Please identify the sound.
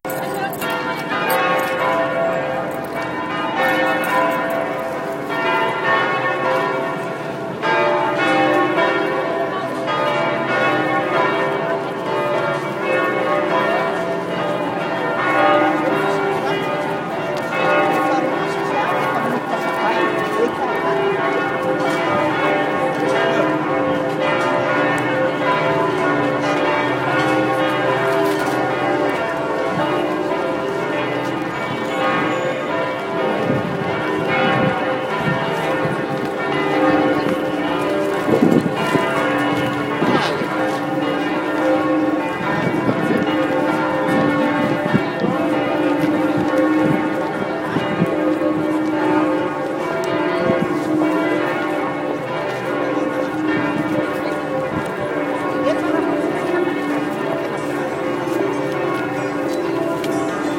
Notre Dame Bells, Paris
Notre-Dame, Paris, church, cathedral, bells, field-recording